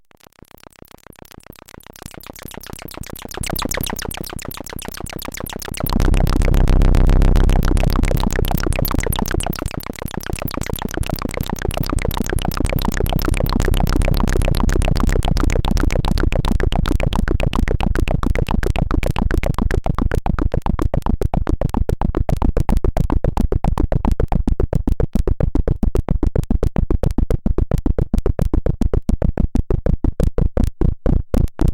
gh850pxjbsvfur47
sounds created with modular synthesizer
synthesis, biiip, modular